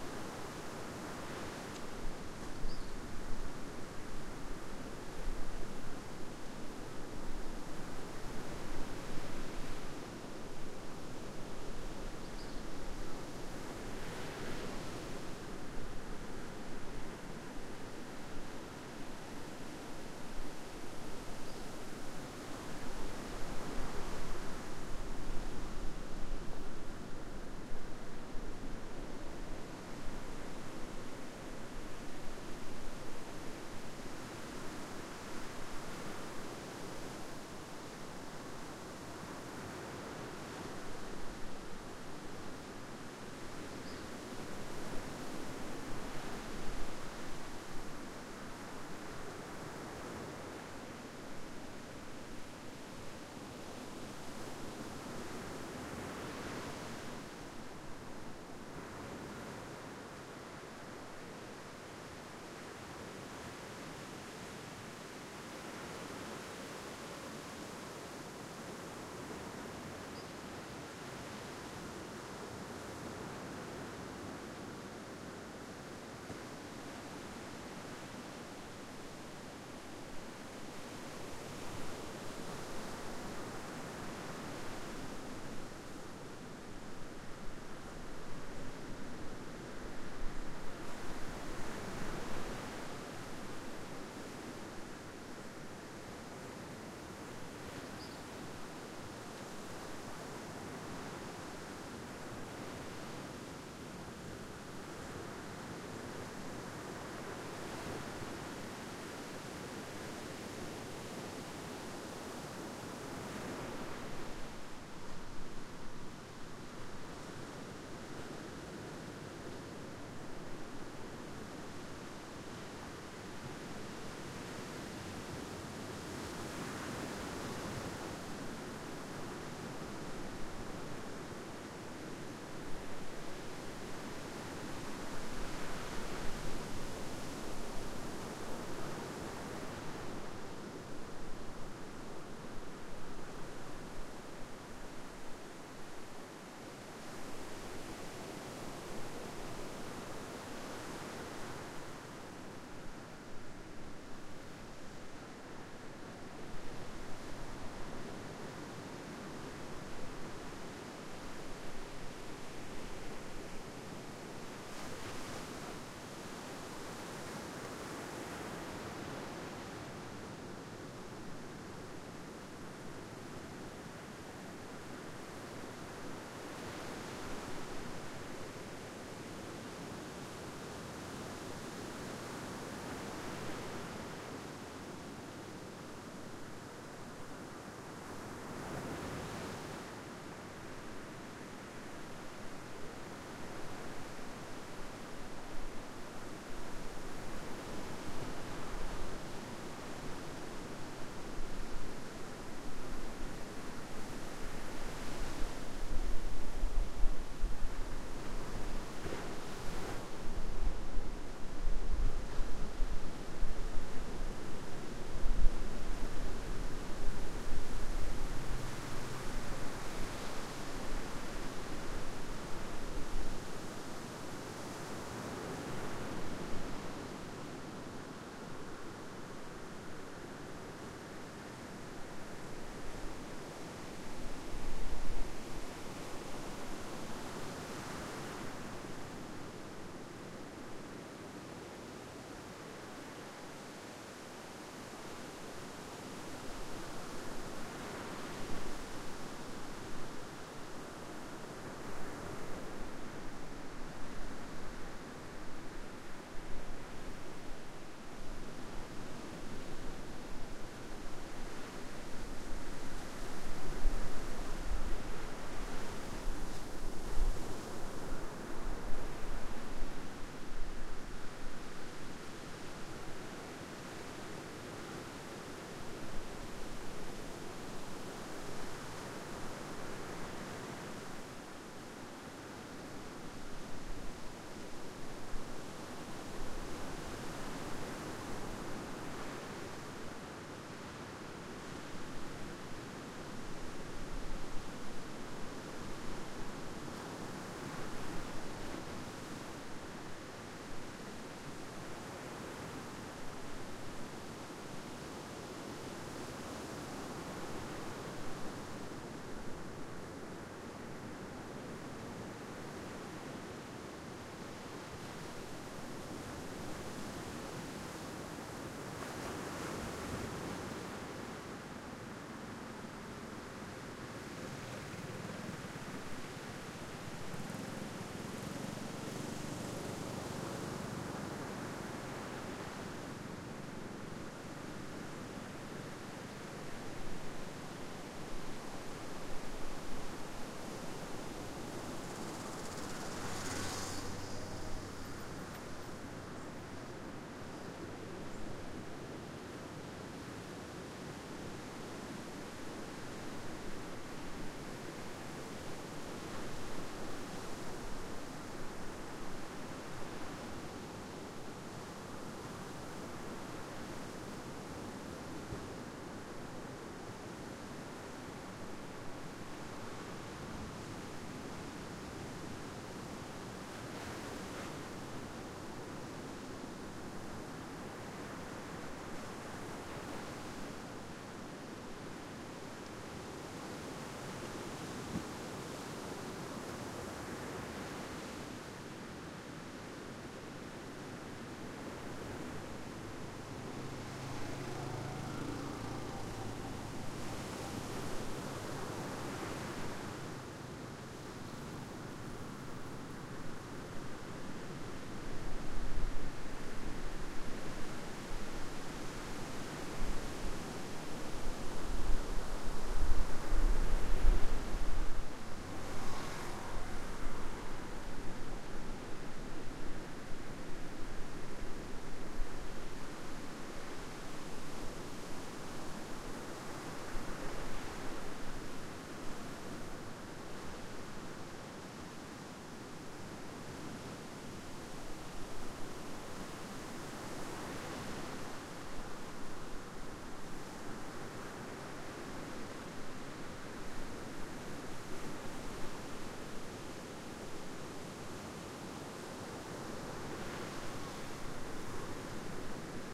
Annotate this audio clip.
Samana-May 16-Playa Bonita
Soundscape recording at Playa Bonita, near Las Terrenas, on the Samana peninsula in the Dominican Republic. May 16, 2009.
dominican, playa, beach, terrenas, bonita, samana, republic, las